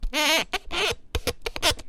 One in a series of eight, rubbing a knife around on some cabbage to create some squeaking, creaking sounds. This might work OK for a creaking door or maybe even some leather clothes. Recorded with an AT4021 mic into a modified Marantz PMD 661 and trimmed with Reason.
creak, foley